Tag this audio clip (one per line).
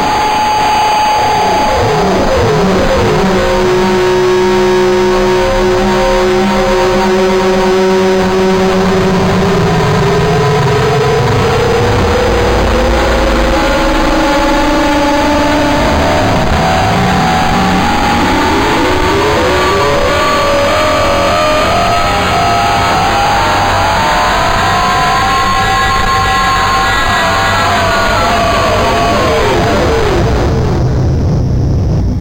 harsh
funk
feedback
noise